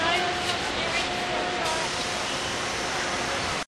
newjersey OC wunderdunder
Something at Wonderland Pier in Ocean City recorded with DS-40 and edited and Wavoaur.
field-recording, ambiance, ocean-city, wonderland